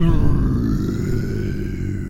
Voice with a heavy compression and reverb.